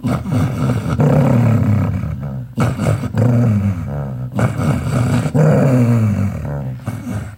Very angry dog.
How it was created: I caressed my dog a lot. He looks angry but he is not really. Recorded by me on a cell phone Samsung J5.
Software used: Audacity to reduce noise, edit and export it
dog, growling, growl, angry, Very